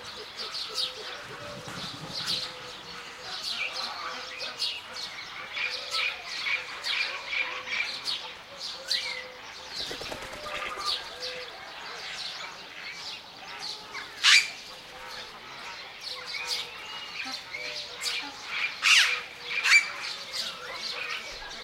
strident bird calls at Canada de los Pajaros, a bird sanctuary near Puebla del Rio, S Spain. The sounds somehow made me think of a Jurassic ambiance, hence the file names. Sennheiser ME66+MKH30 into Shure FP24, recorded with Edirol R09. Decoded to M/S stero with Voxengo free VST plugin, otherwise unedited.
ambiance, birds, bird-sanctuary, crane, field-recording, geese, nature, sparrow, spring, stork